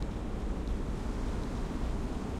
air conditioner outside of building
air, conditioner, outside